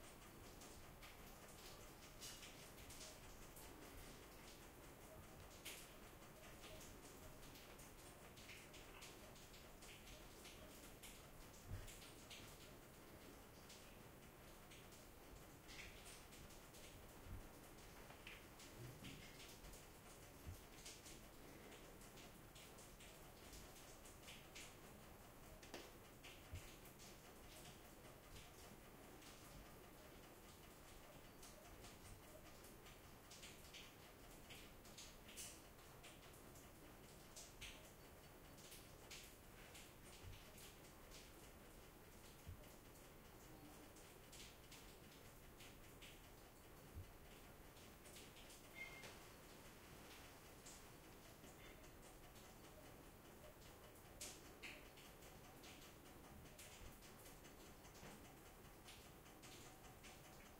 027-Rainy Kitchen AMB
House, Kitchen